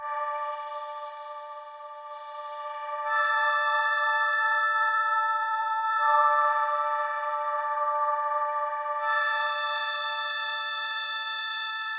High synth pad recorded from a MicroKorg (preset A58). Quite thin, untreated.